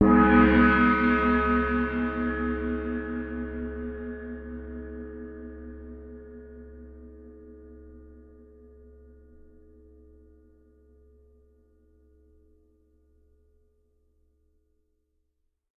Crash Gong 03
Cymbal recorded with Rode NT 5 Mics in the Studio. Editing with REAPER.